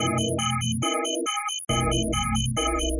Percussive rhythm elements created with image synth and graphic patterns.
soundscape, loop, element, synth, image, percussion